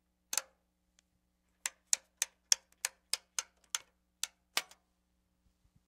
Toggle switches from an old LEADER NTSC Video Pattern and colorbar generator. Sennheiser ME66 to M Audio Delta
button, click, dial, switch